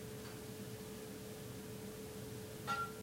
Fluorescent lightbulbs turning on.